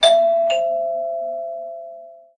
I've edited my doorbell recording in Audacity to (sort of) tune it to an octave from C to B, complete with sharp notes.
house, chime, dong, bing, ding, f, bong, door, ping, tuned, doorbell, ding-dong, bell, door-bell, octave, ring